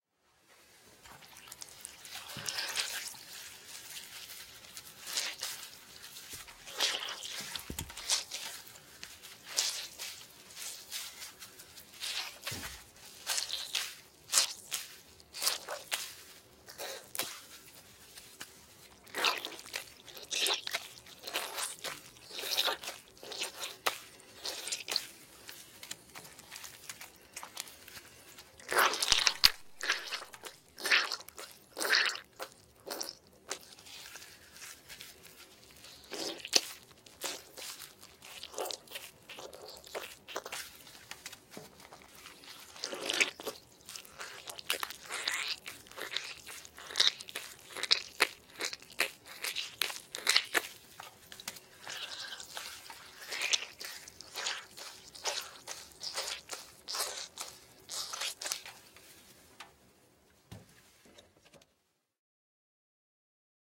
Extended squish sounds created with very wet paper towel.
goo, Marsh, muck, mud, Ooze, slogging, sound, Squish, Swamp